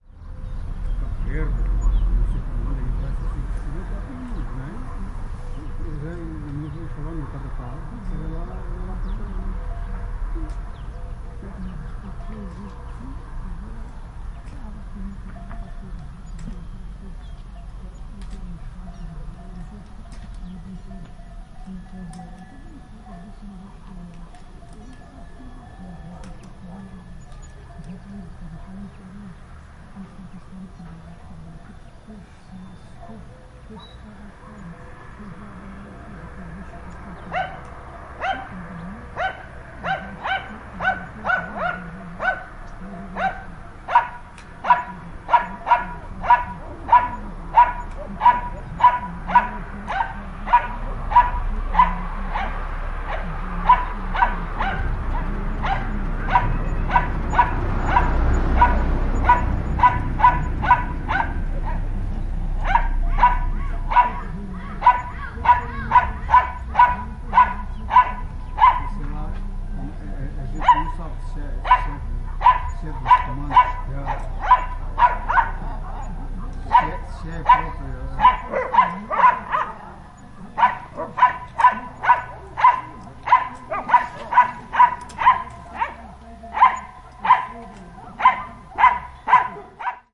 Street scene with nervous dog
Street scene in Castelo de Vide, Portugal. You hear the hum of a near highway, cowbells and people talking. A car drives by. At second 37, a dog starts barking quite hysterically, later joined by another dog. Recorded with an Olympus LS-14.
cars, dogs, field-recording, highway, people, portugal, road, street, traffic